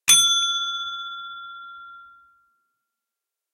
bell ding 3
Ding sound of a pet training bell.
Recording device: Blue Yeti
ding, bell, chime, ping, ring, desktop-bell